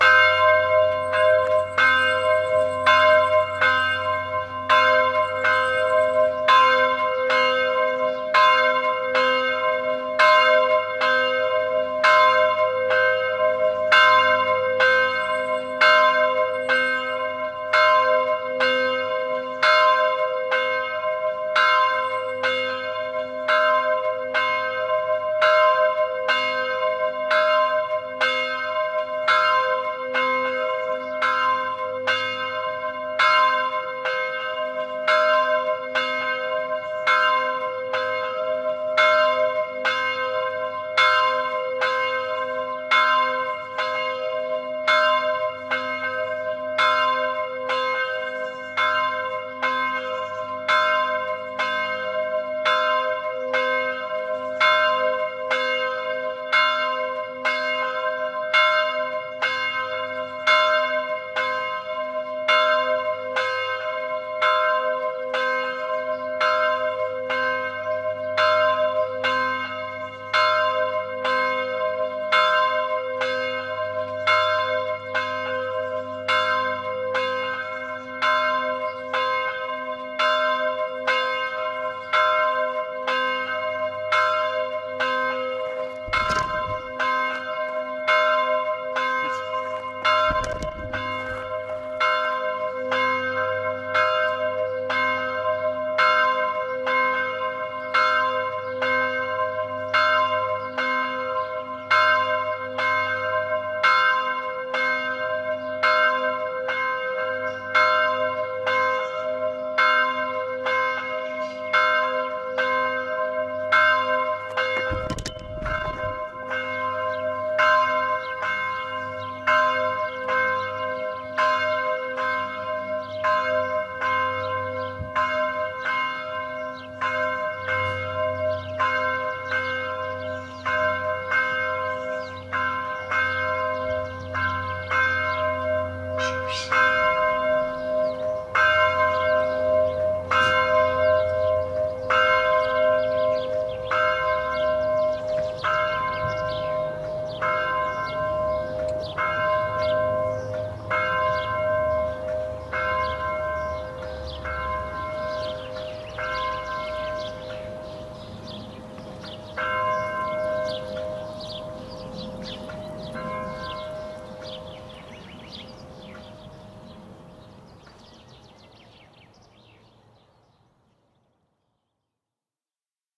A churchbell ringing in the small village of Francheville (Belgium) - exterior recording - Mono.
Recorded in 2003
Tascam DAT DA-P1 recorder + Senheiser MKH40 Microphone.